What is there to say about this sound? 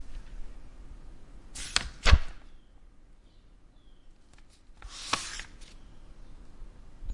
page turn
Page being turned